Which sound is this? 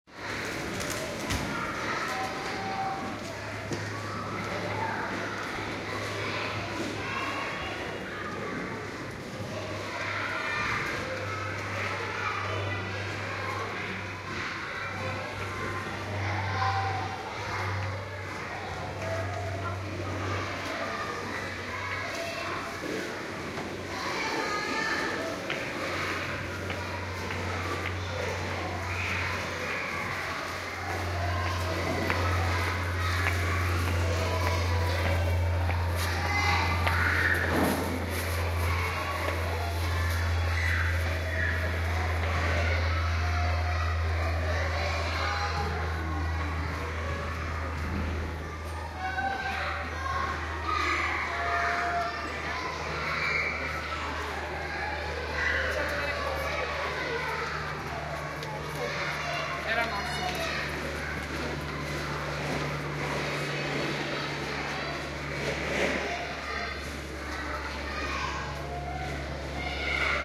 20080303 Venice next to school2
This is a field recording of a schoolyard behind a wall.
binaural, children, field, playing, recording, schoolyard, venice